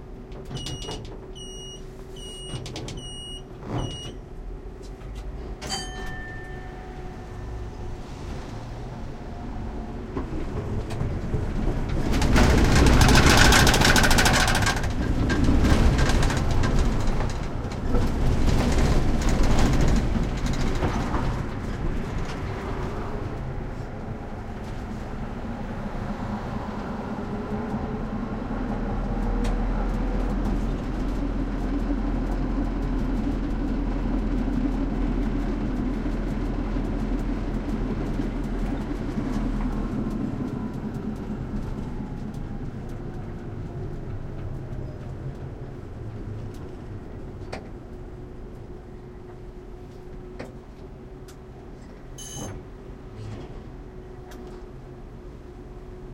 polish tram 105N
105n, streetcar, tram